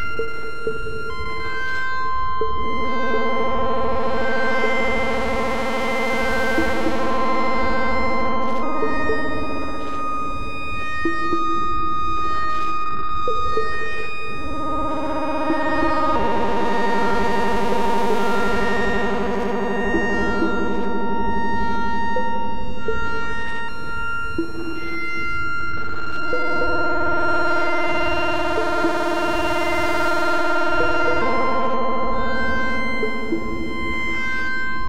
Cloudlab 200t V1.2 (Buchla Software Emulation) » 0009 Mix-16
Cloudlab-200t-V1.2 for Reaktor-6 is a software emulation of the Buchla-200-and-200e-modular-system.